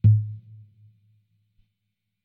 kit-lotom-08-fx
MPC Electronics - The KIT: lo-tom through FX.